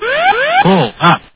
Pull Up Warn
Pull Up Alert
Cockpit Sound
alarm, alert, cockpit, pullup, sound, up, warn